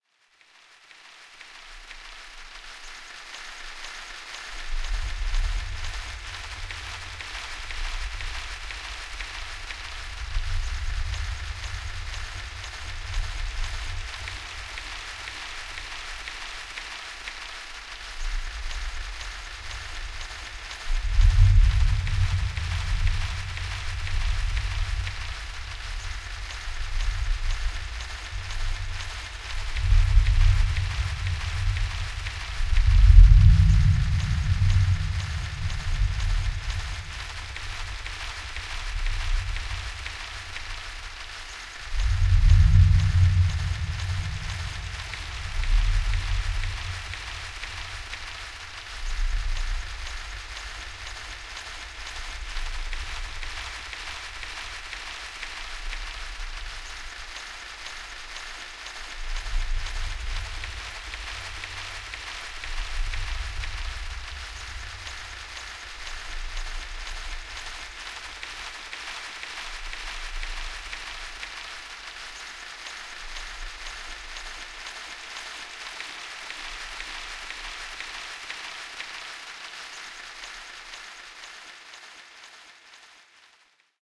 FP Rainstorm
Artificial rain storm created with metal sheet and pine needles rattled against a fiberglass door.